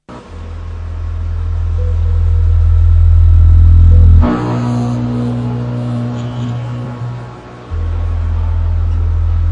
The sound outside some sort of... facility in elephant and castle
ambient, field-recording, industrial